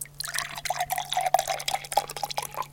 a Record of me filling a glass with water
water, drink, fill, household, glass, liquid